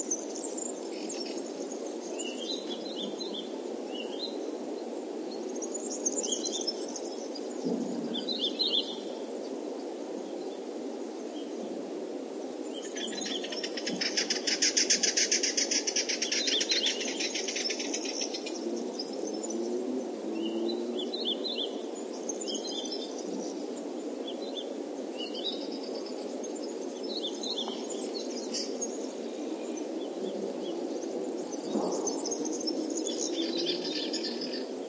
ambiance of scrubland, with birds singing (mostly warblers and tits). RodeNT4>iRiverH120(Rockbox)/ ambiente en matorral, con cantos de pajaros (currucas, herrerillos, etc)
warblers, field-recording, scrub, nature, tits, birds